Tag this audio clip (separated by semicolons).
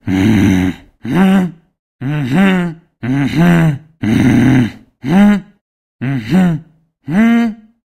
mh; piss-off; german; young; russian; grunt; english; mhm; hm; hmm; man; leave-me-alone; male; disturbed; meh; eh; arrogant; asshole; anti-social; pisser; disturbing; antisocial; annoyed; human; mhmm; grunts